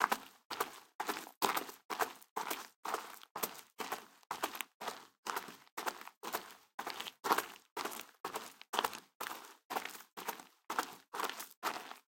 Footsteps Gravel+Dirt 5
Leather, Studio, Styrofoam, Grass, Strolling, Dirt, Tape, NTG4, Walking, Footstep, Walk, Rubber, Path, effect, Ground, Run, Pathway, Shoes, Paper, Foley, Footsteps, Boots, sound, Running, Rode, Microphone, Stroll